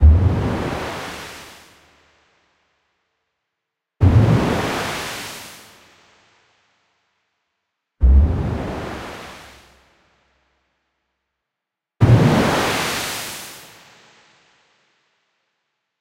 FX Diving (JH)
This sound was created using "The Legend" synthesizer
Diving, FX, Immersion, Legend, Loop, Noise, Ocean, Sample, Sea, SFX, Surfing, Synthesizer, The, Water, Wave